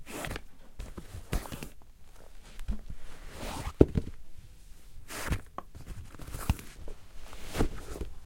Snöra upp skor
The sound of me lacing my shoes.